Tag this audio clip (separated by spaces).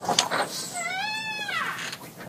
creak creaking creaky door front-door open opening squeak squeaking squeaky wood wooden